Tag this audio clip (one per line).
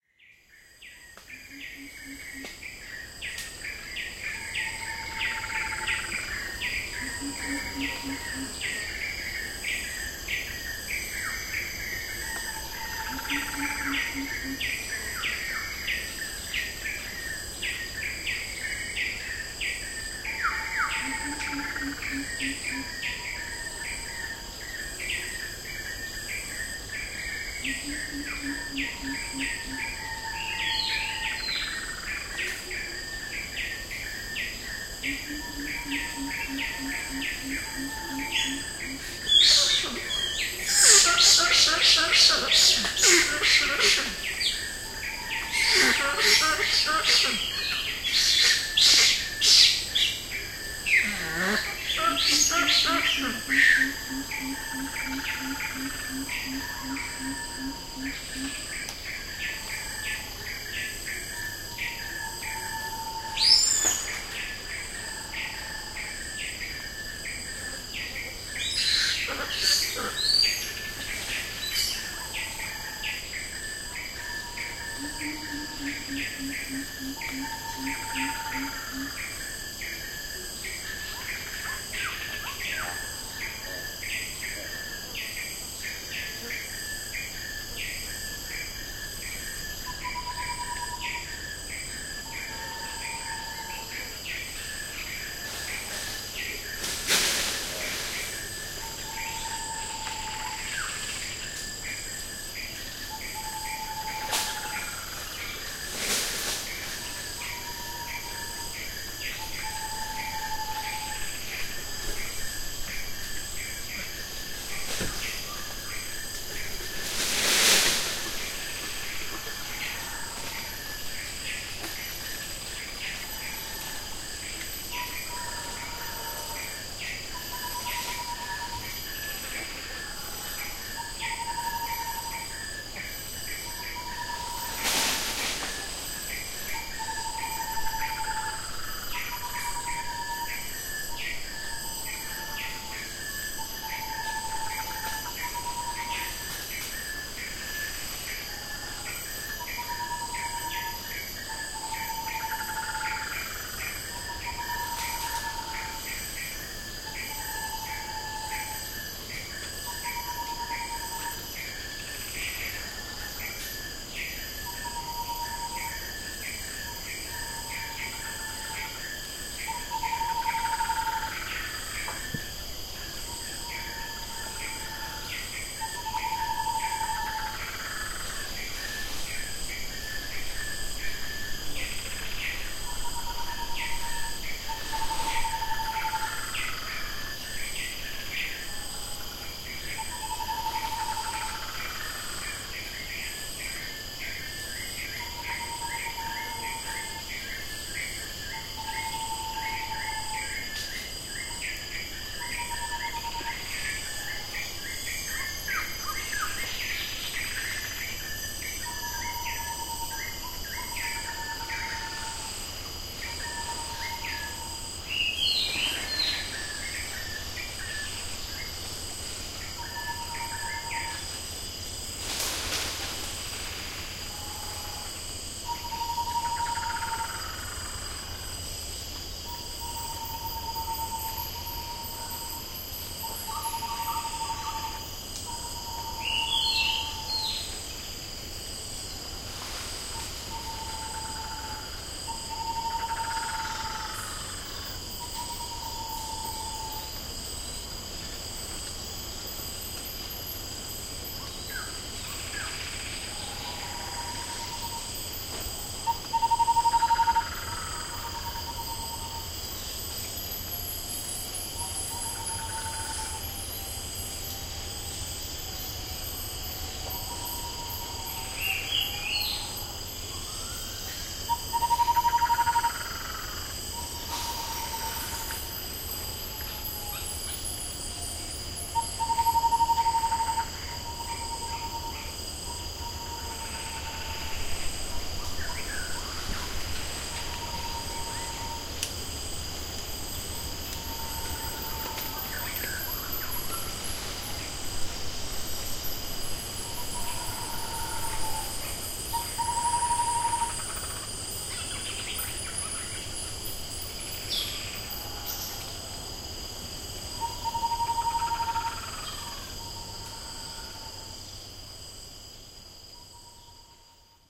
bird
bird-call
birds
Borneo
bugs
cicada
crickets
field-recording
forest
Indonesia
insect
insects
jungle
Kalimantan
larvatus
monkey
Nasalis
national-park
nature
nature-sounds
orangutan
primate
proboscis-monkey
Puting
rainforest
swamp
Tanjung
trees
tropical